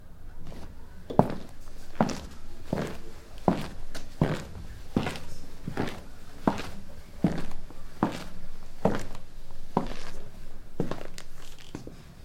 man walking indoors with leather leathery shows and stops footsteps foley
foley, footsteps, indoors, leather, leathery, man, shoes, walking